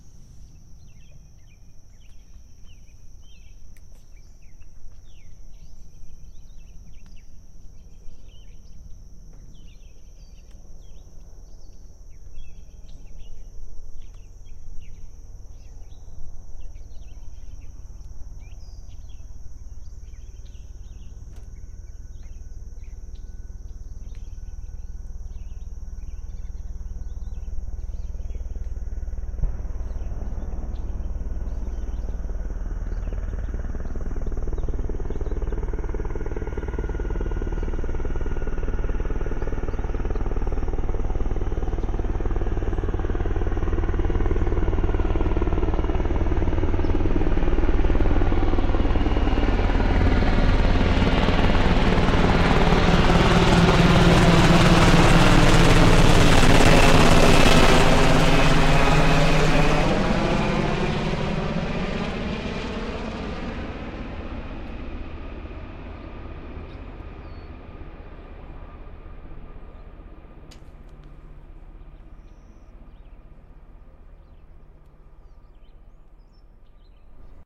recording a very close flyby of a Blackhawk Helicopter. I was try to record lake noises and could not get to my preamp control fast enough to turn it down to avoid distortion.
lake, flyby